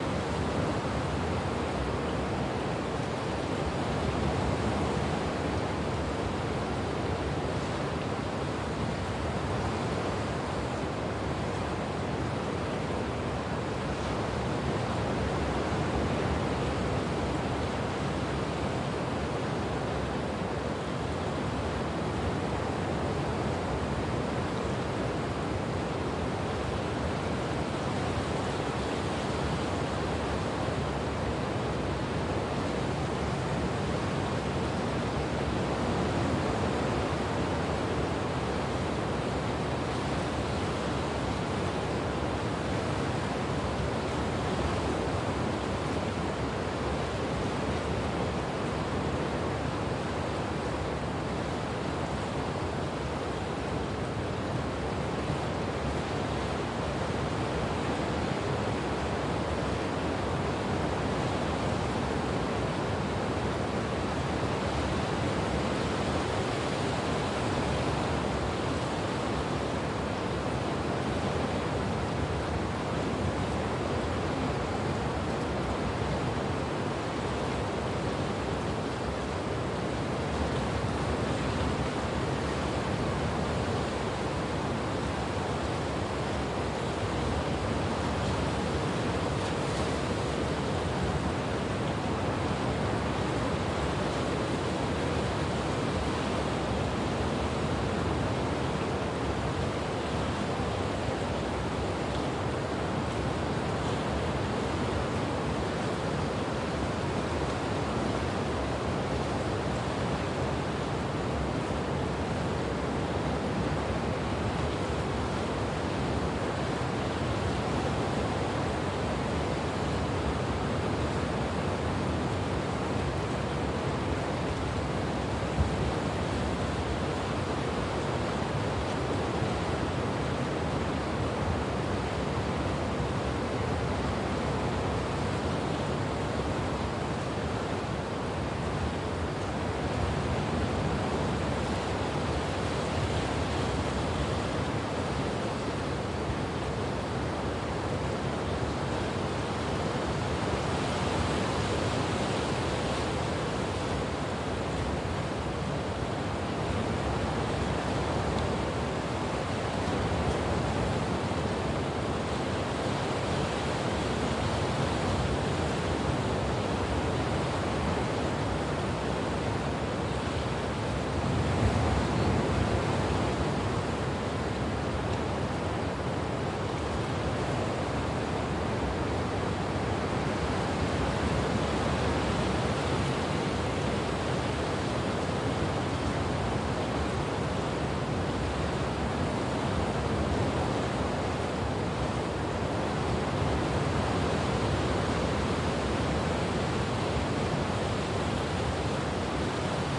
Ocean Gentle Lapping Waves Under Dock
wind, crashing, field-recording, ocean, waves, nature, beach